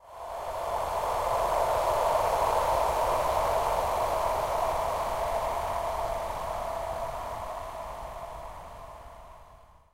Gust of Wind 5
Processed wind noise.
I slowed it down in Audacity.